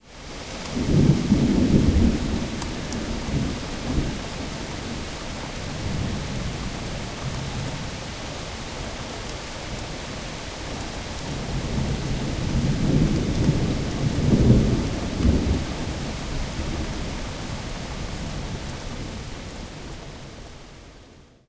NYC Rain 3B- interior Thunder distant traffic B
NYC Rain Storm; Some traffic noise in background. Rain on street, plants, exterior home. Interior Perspective